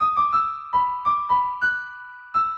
Sound of few notes being played on digital sampled piano.
piano, sample, highs, few-notes, notes